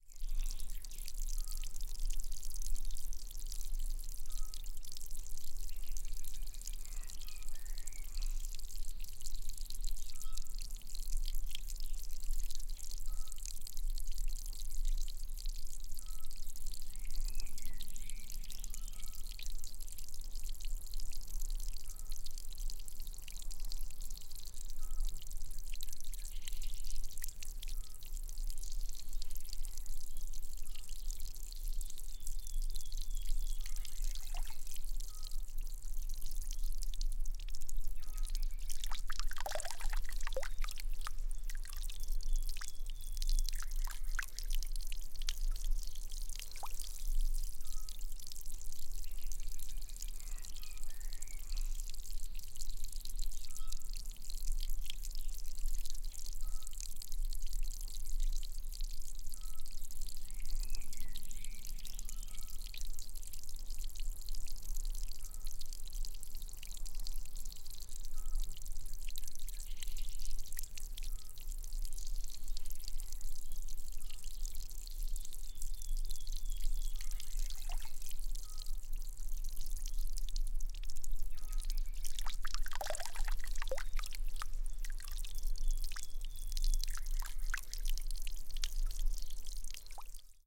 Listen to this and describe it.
Close up of a small fountain ("lavoir") in a very quiet village located in Miradoux, France, Gers. Beautiful birds and frogs all around.Recorded with MS schoeps microphone through SQN4S mixer on a Fostex PD4. decoded in protools